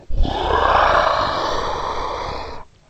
dragon growl breathy 4
Dragon sound created for a production of Shrek. Recorded and distorted the voice of the actress playing the dragon using Audacity. Check out the rest of the dragon sounds pack!
beast, creature, dragon, growl, growling, monster, roar, vocalization